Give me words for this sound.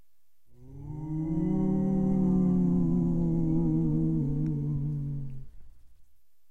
ghostly moan
I was looking for this sound on the site and ended up making my own. I'd like to give something back since I used so many spooky sounds for my Halloween song recording.